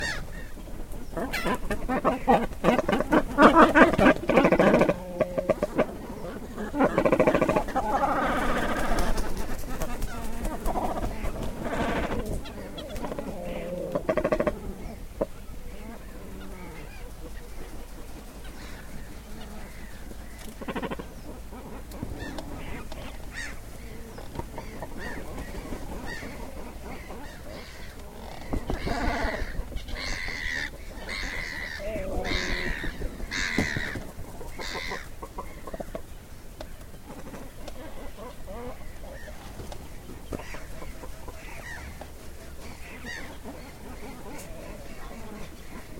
animals cormorants inside nest loud wings mono DPA4061
This ambient sound effect was recorded with high quality sound equipment and comes from a sound library called Cormorants which is pack of 32 audio files with a total length of 119 minutes. It's a library recorded in the colony of the Cormorant birds. Recordings in this library features sound of more than 1000 birds singing at the same time, including recording from nests of the nestlings and seagulls.
ambient, animals, atmo, atomosphere, beast, bird, birds, colony, cormorant, design, forest, location, monster, natural, nature, nest, nestlings, sound